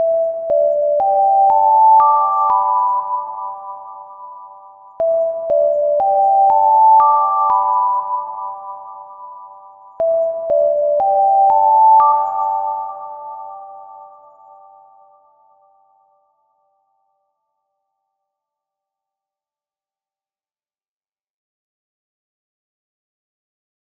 TAI cay saati alarm uber reverb
Tea spoon recorded with a cheap headset mic. So it has a background noise. The alarm produced using sine wave+reverb.
This one has more reverb.
time, tea, alarm